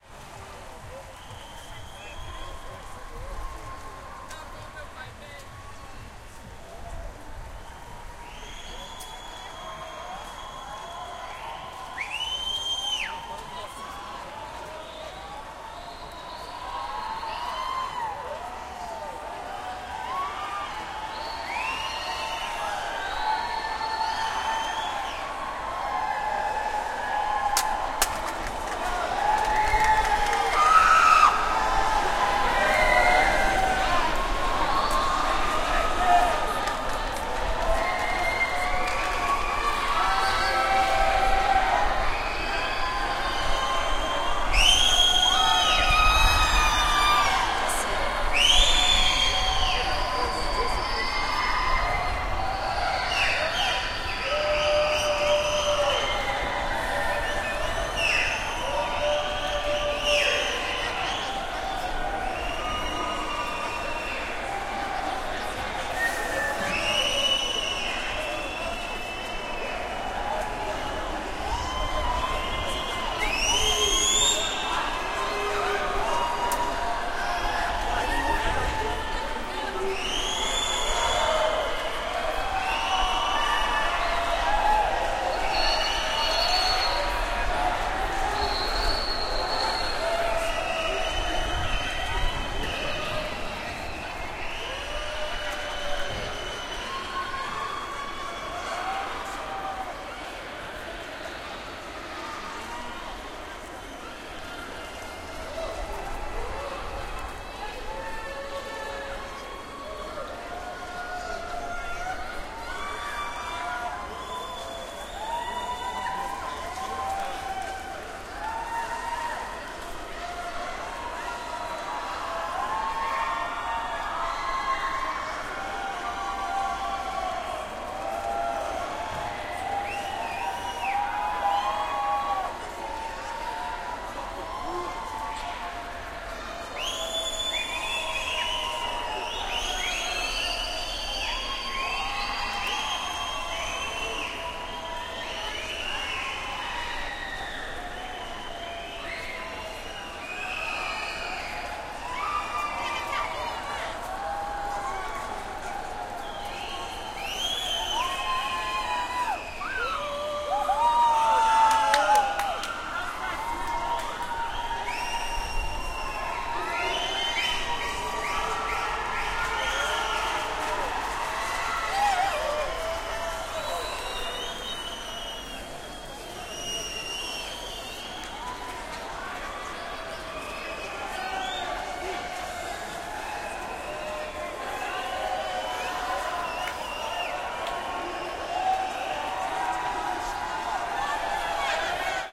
RDJ-tunel-new year
Walking through tunnel between Botafogo and Copacabana, Rio de Janeiro, Brazilia in the evening of 2014/12/31. Recorded with DIY binaural sunglasses and Nagra Ares-P.
ambience, new-years-eve